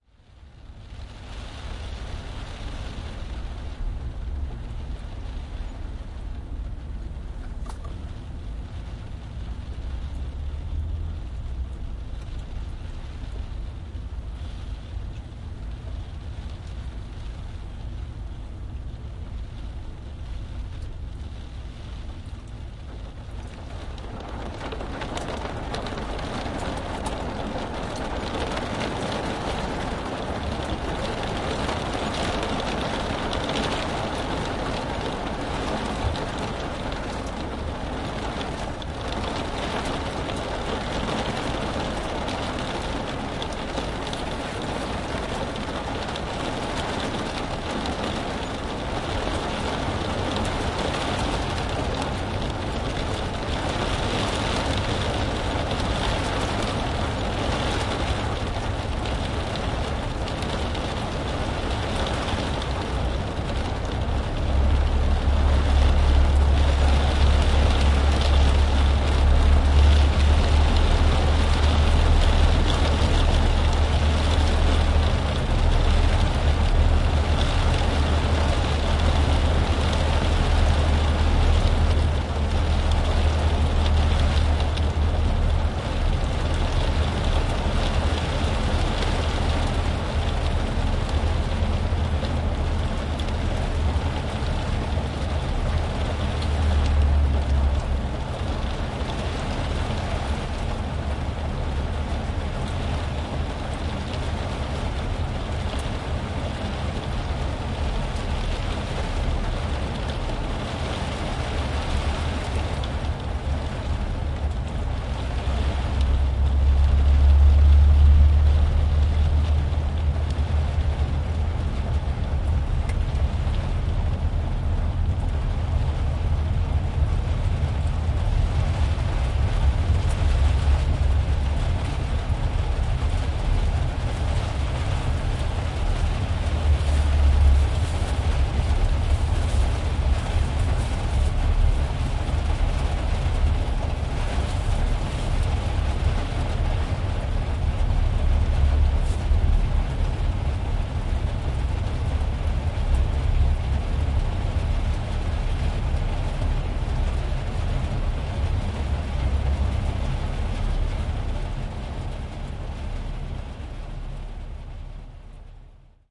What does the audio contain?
04.08.2011: fifth day of ethnographic research about truck drivers culture. The sudden downpour on the Elba river. Sound of rain beating on a truck cab.